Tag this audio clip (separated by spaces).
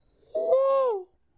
human voice